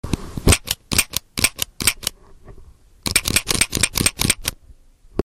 recorded with an olympus recorder my gun being fired out of bullets
automatic, bullets, empty, gun, out